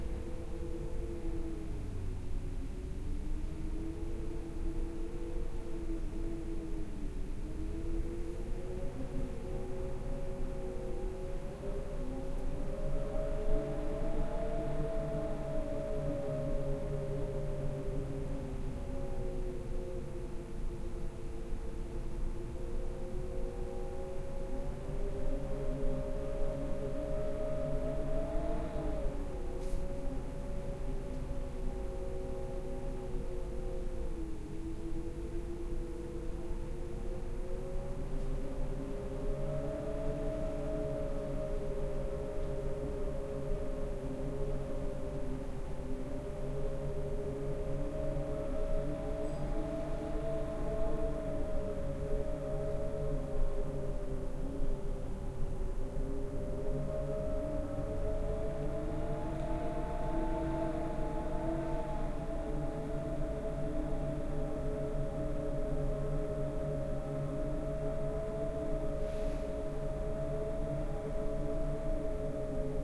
Binaural stereo recording of howling wind heard from indoors.
ambiance, ambience, ambient, binaural, field-recording, haunting, howling, inside, stereo, wind